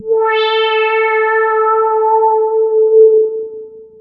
Multisamples created with subsynth. Eerie horror film sound in middle and higher registers.
evil, horror, multisample, subtractive, synthesis